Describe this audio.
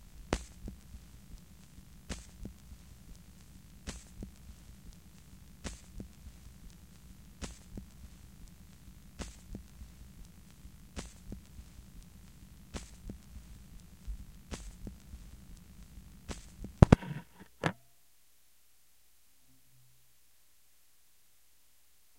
record end (squish beat)
Something happened at the end of the record.